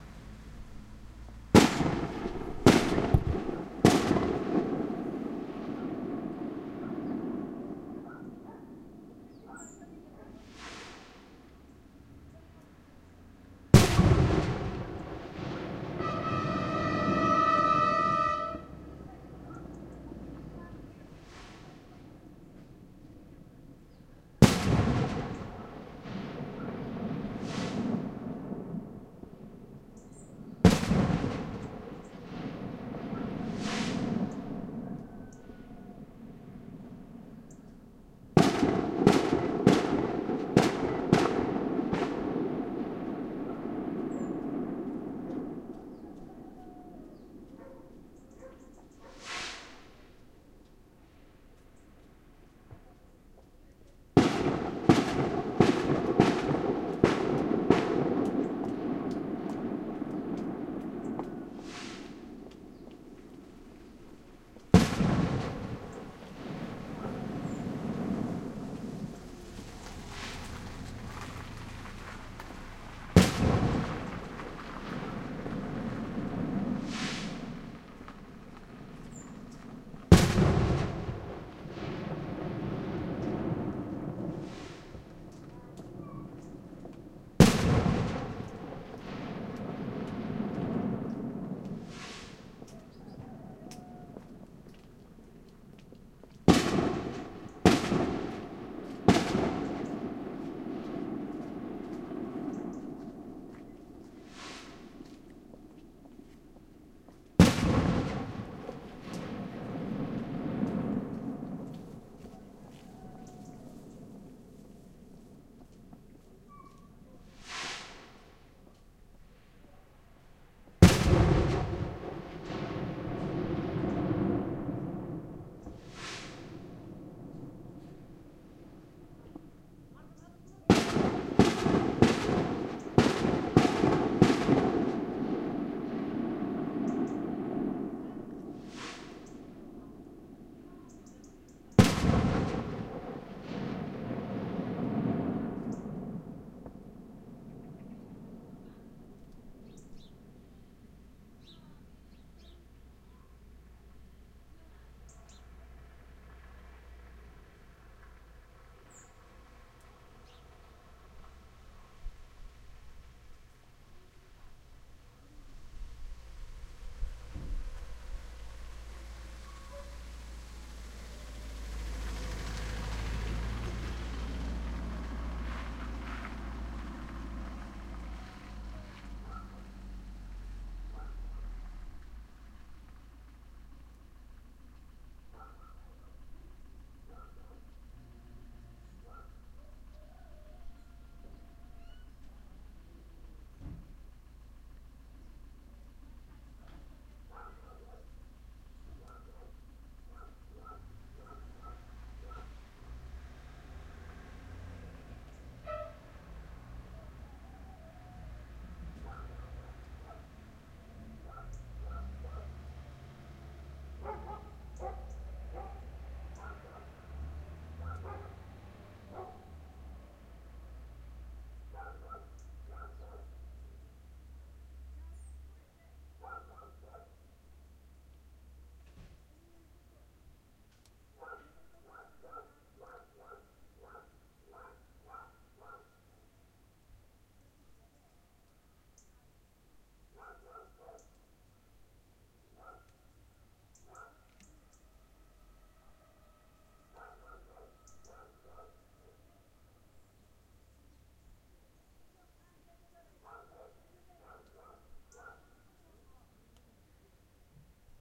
Fiestas en Güimil
Fiestas patronales en la aldea de Güimil (Vilarmaior - Galicia)
Grabado con una Zoom H2.
Se escuchan las bombas de palenque que las gentes del lugar tiran desde el campo de la fiesta en la iglesia. Una señora baja por el camino, se escuchan sus pasos. Pasa poco después un coche. Se escuchan ladridos lejanos.
Festas en Güimil. A xente da aldea está de patrón e hai que celebralo tirando bombas de sete estalos.
agriculture, bombas-de-palenque, church, country, countryside, rural, walk